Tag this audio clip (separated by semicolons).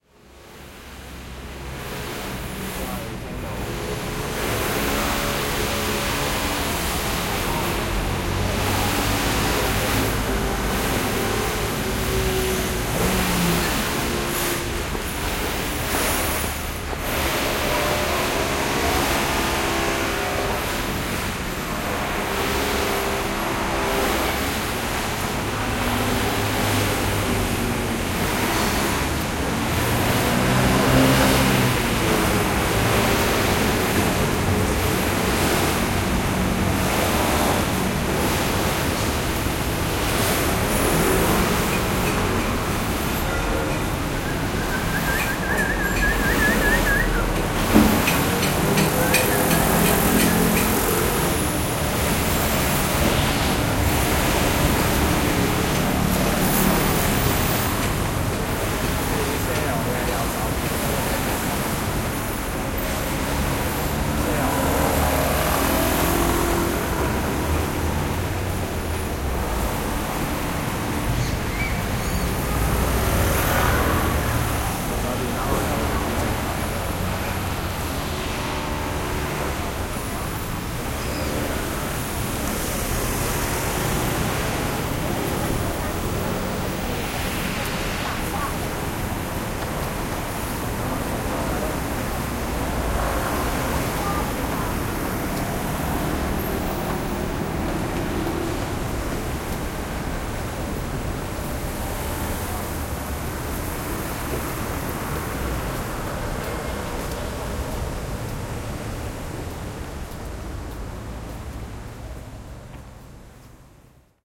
Macau-Soundscape; Streets-of-NAPE